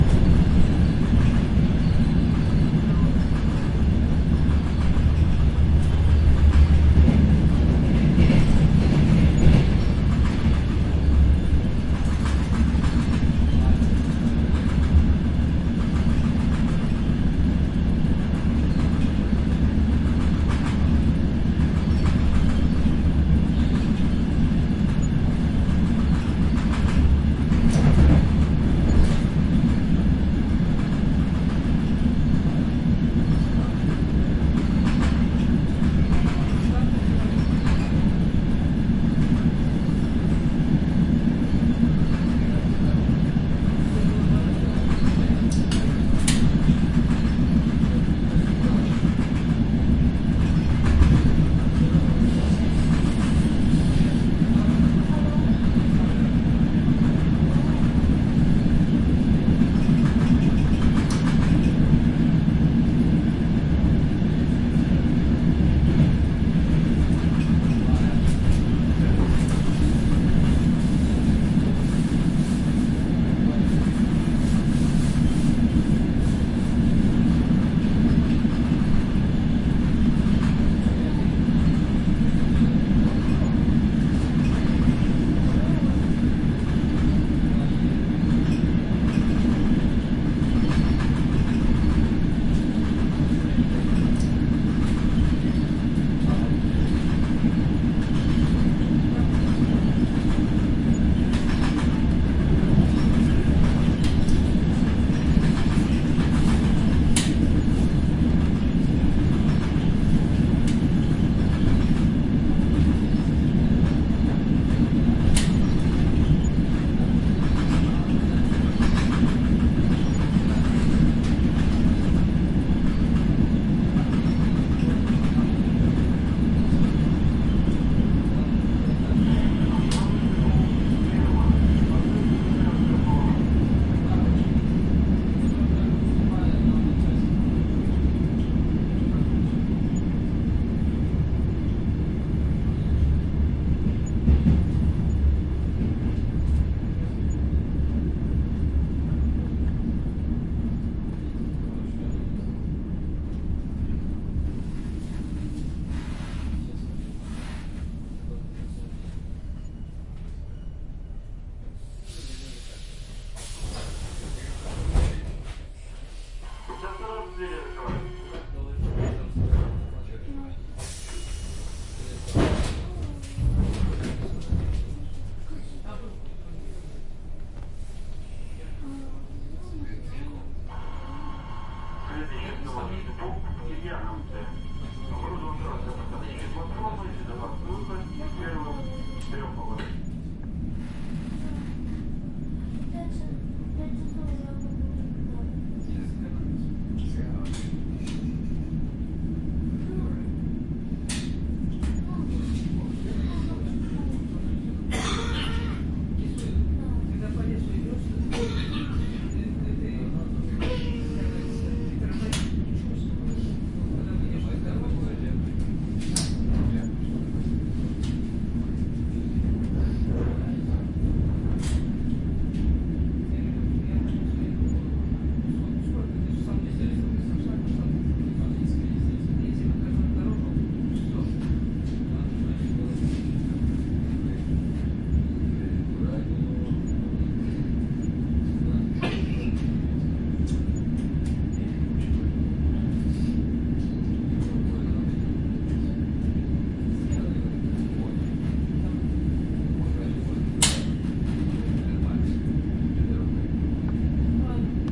Train. Belarus.Lida-Maladzieczna 1
train noise traffic road
noise, road, traffic, train